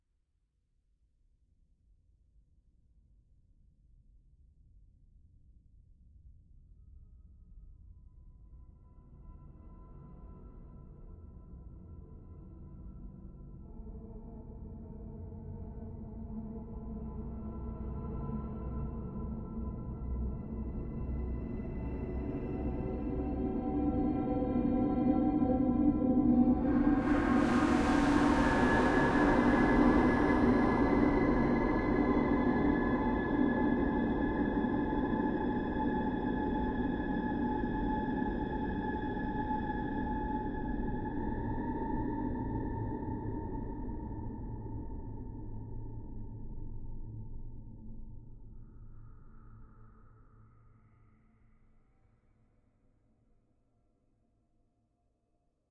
Dark Emptiness 013
tenebroso, suspenso, dark